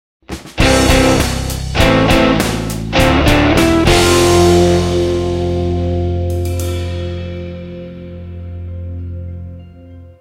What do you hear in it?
Today I have a short cheerful intro for your (commercial) project.
Exception: Political or sexual content